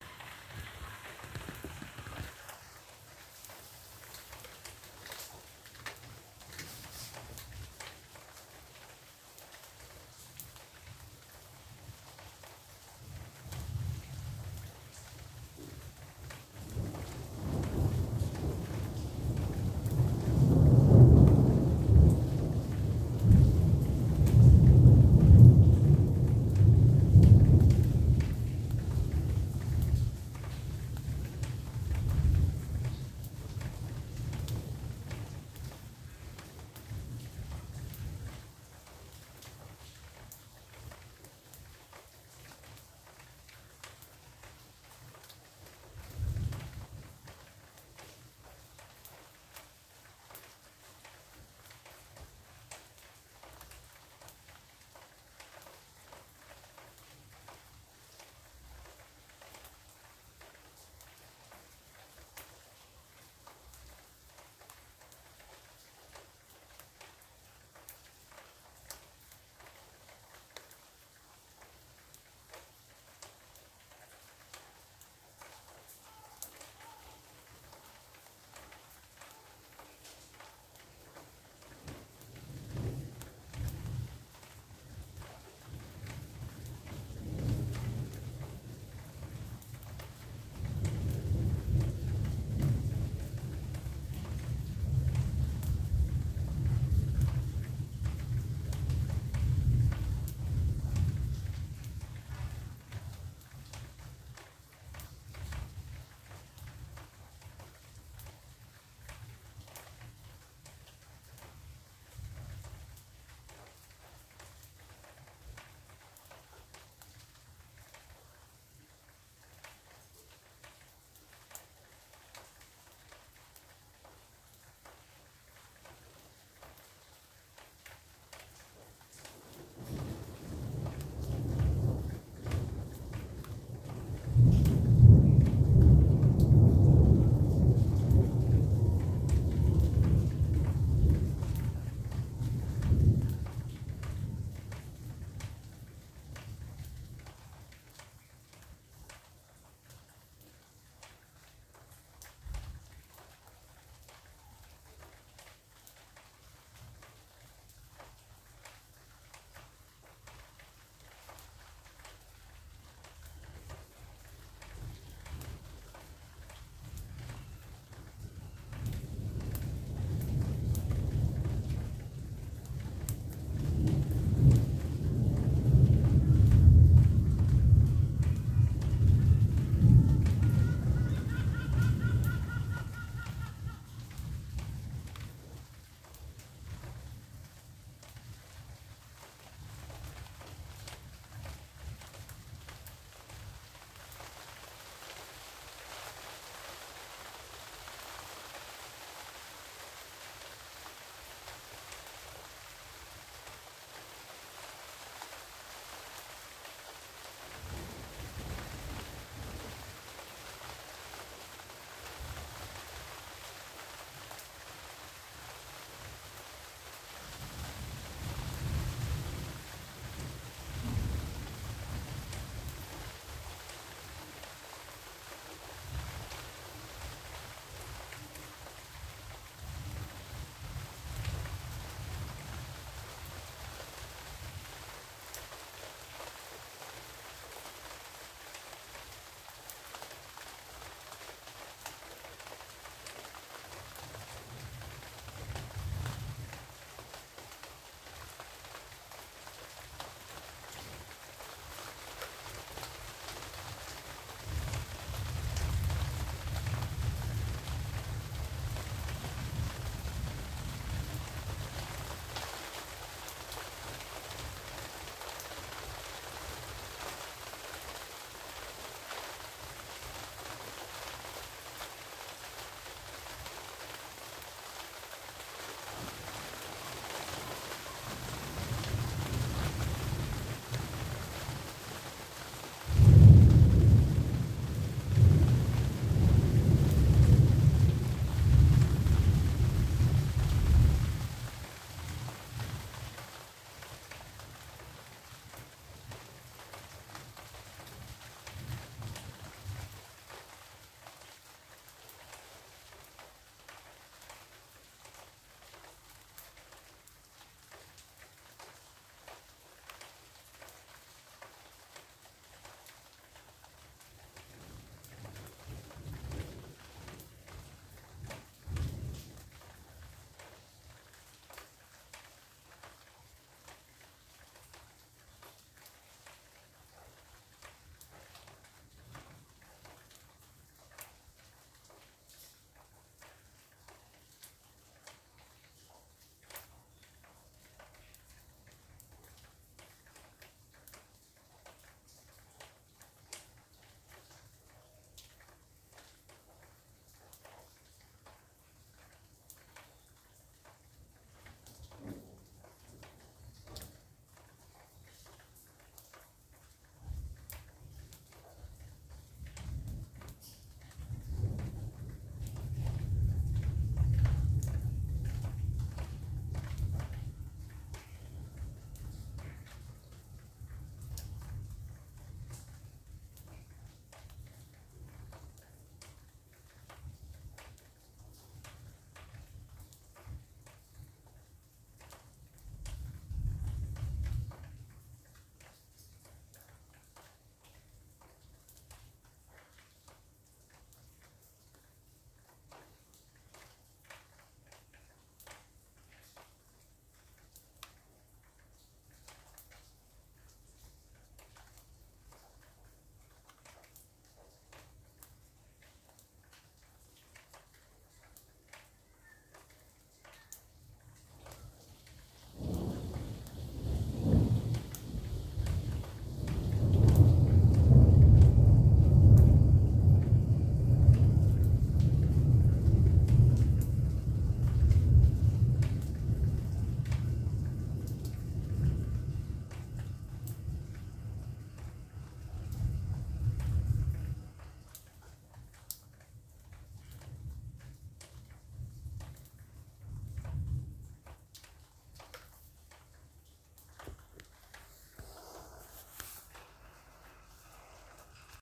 A raw recording of thunderstorm that my father recorded, Istanbul, Turkey. Heavy thunderstorm interrupting sleep at 3 am (but it didn't wake me up)